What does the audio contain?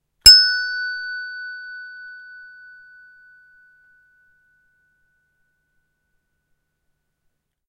Hand Bells, F#/Gb, Single
A single hand bell strike of the note F#/Gb.
An example of how you might credit is by putting this in the description/credits:
The sound was recorded using a "H1 Zoom V2 recorder" on 15th March 2016.